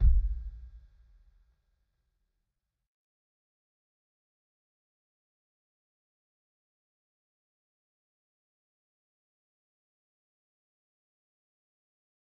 Kick Of God Bed 010
drum, god, home, kick, kit, pack, record, trash